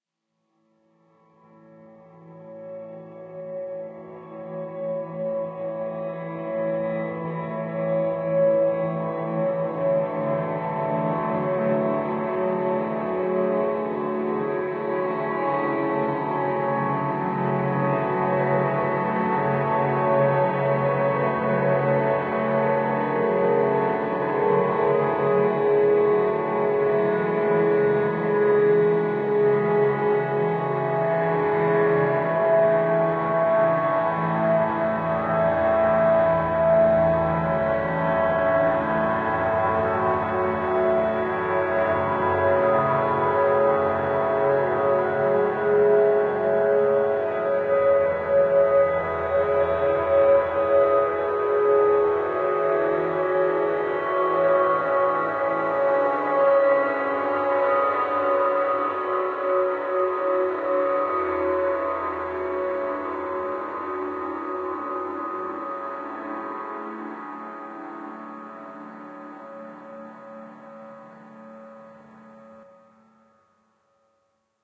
s piano tapeish random etude scape
drone, space, evolving, piano, ambient, pad